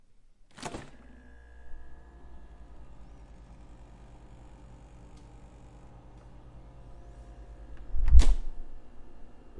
fridge open and close
opening a refrigerator door, the hum, and closing it